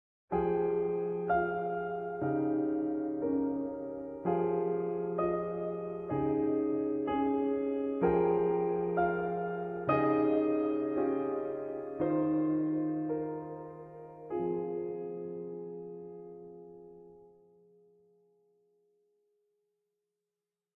A long mellow contemplative phrase ending rather sorrowful.
disheartened, melancholy, sorrow